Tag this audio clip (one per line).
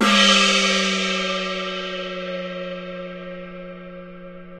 percussion
china
peking-opera
qmul
daluo-instrument
beijing-opera
compmusic
gong
chinese-traditional
idiophone
chinese
icassp2014-dataset